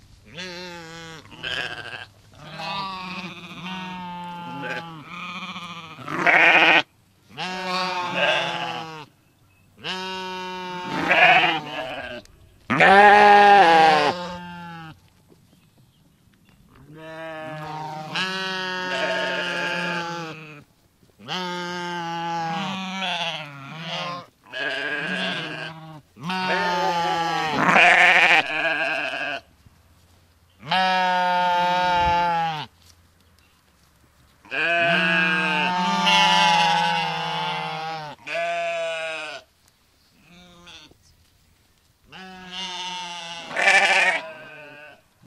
Sheep bleating on a sheep farm. Some distant, some very close. Recorded with a FlashMic.